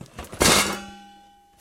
Crash of metal objects
chaotic, clatter, crash, objects